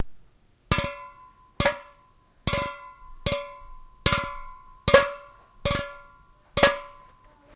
raw tincan2
Foley cans clanging sound created for a musical tribute to the movie, warriors.
tin, can, soda, aluminum